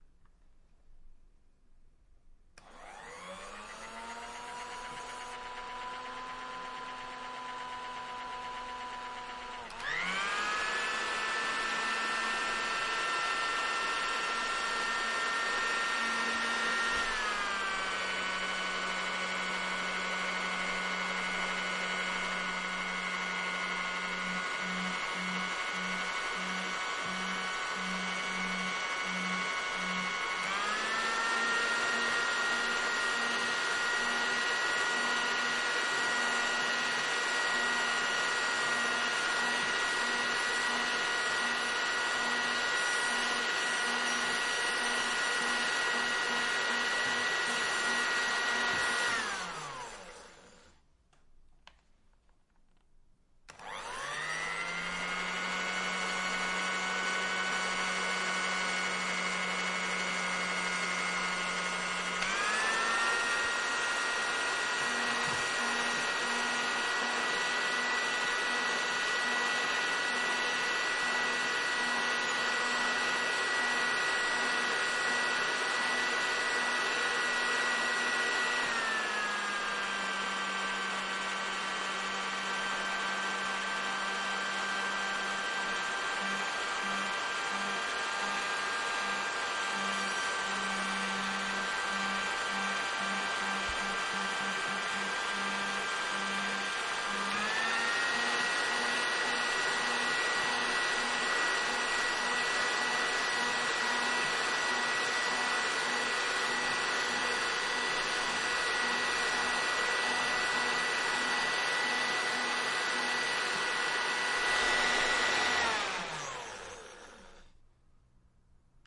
Hair Dryer sound on multiple speeds. I used it as layor recreate a carwash.
blow, car, Dryer, Hair, wash, wind